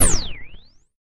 STAB 004 mastered 16 bit
An electronic percussive stab. Sounds like a zap sound with a pitch
bend superposed on it. Created with Metaphysical Function from Native
Instruments. Further edited using Cubase SX and mastered using Wavelab.
electronic, industrial, percussion, short, stab